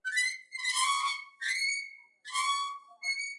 This sound was recorded from a metallic trash clench which we pushed and let it stop by itself. It has a compression effect and noise reduction. Recorded with ZoomH4 with built-in microphones.